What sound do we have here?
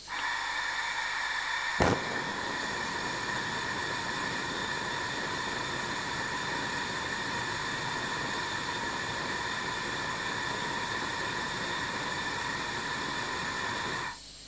gas stove 1
Mono recording of a gas stove burning.
Hissing sound from the gas flowing with a short burst of noise as the gas ignites.
A subtle fluttering sound is heard as the gas burns.